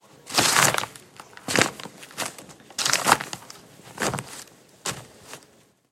pisar monton papeles
step, paper